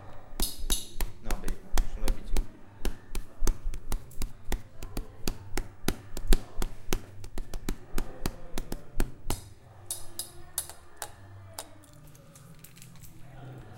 sella beat 002
mechanic, bike, horn, bell, bicycle, metallic, cycle